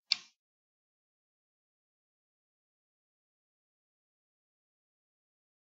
sonidos para el final stems Click
ticking of a clock
clock, tick, ticking